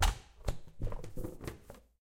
Percussive sounds made with a balloon.
percussion, balloon